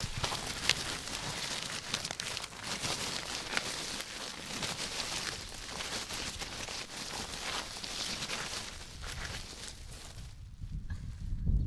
dragging a bag of coal along some gravel. recorded with a marantz
gravel, heavy